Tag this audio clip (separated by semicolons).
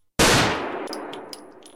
gun-shot; beretta; field-recording; pistol; shot